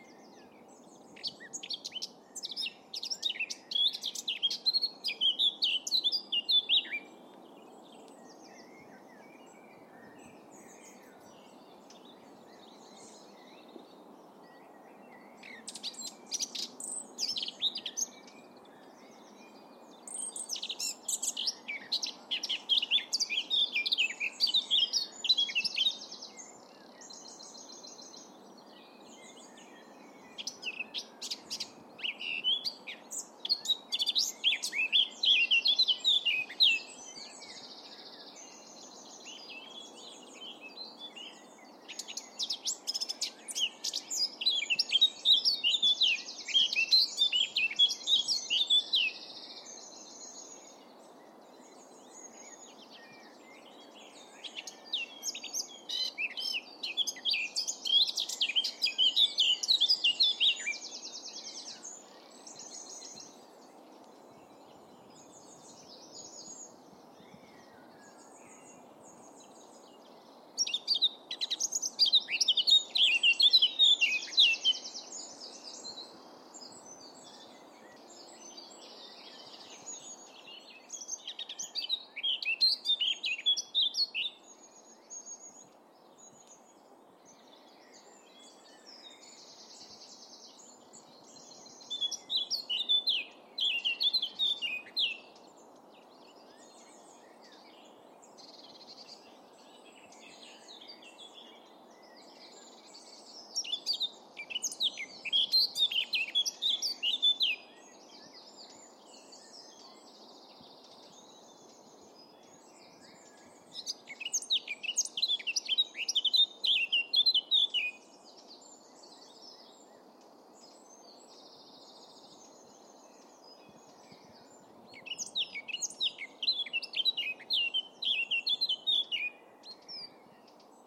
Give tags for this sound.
nature
field-recording
birds
birdsong

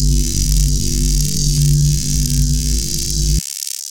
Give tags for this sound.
zap
electricity

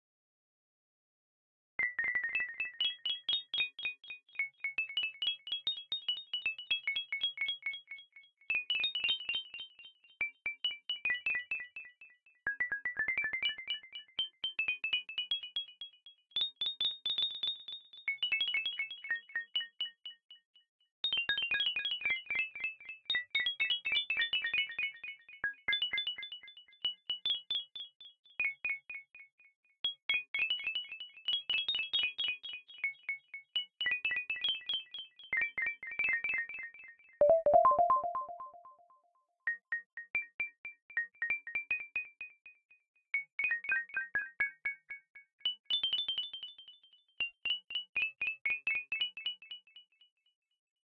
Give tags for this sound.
amsynth
echo
synth
xylofon

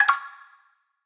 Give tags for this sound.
FX
UI

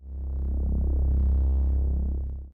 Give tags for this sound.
bass
clipping
drone
filtered
low-pass

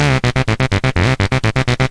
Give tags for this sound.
125bpm
loop
synth

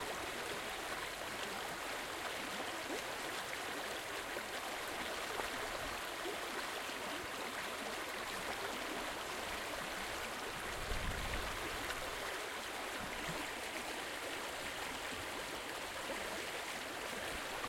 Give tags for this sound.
ambience
river
soundscape
field-recording
water
outdoor

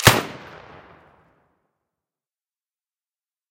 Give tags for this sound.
army
rifle
shot
warfare
weapon

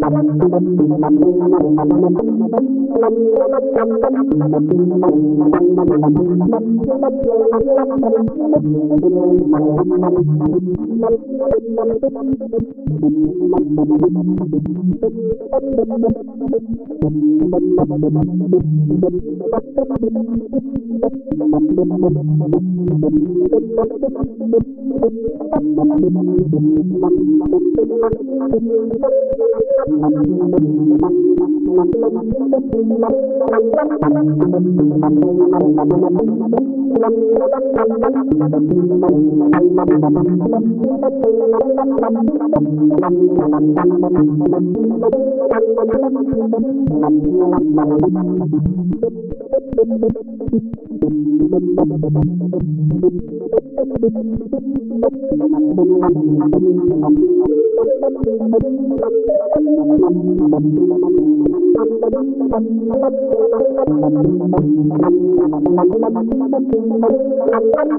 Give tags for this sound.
Novakill
dare-39
synth-loop
synth
Nitrous-PD
VST
mellodic-loop

